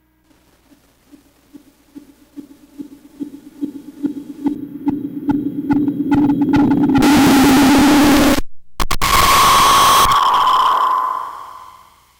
Static Build and Scream Intense 1
Weird static build I made on Korg EMX, noise reduced on Audacity